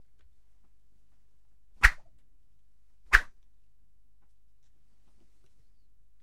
a serie of three WOOSH
wooshes, hd, hires, fouet, woosh